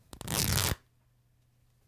A pack of Rips and Tears recorded with a Beyer MCE 86N(C)S.
I have used these for ripping flesh sounds.
Enjoy!
flesh, rip